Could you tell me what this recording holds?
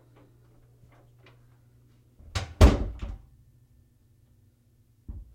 Closing Bathroom Door
Bathroom, Closing, Door